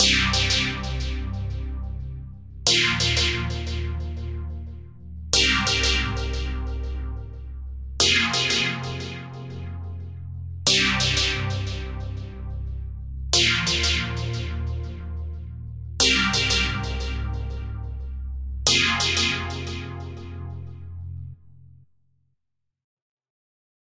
Phaser Chord #3
Phaser Chord in Serum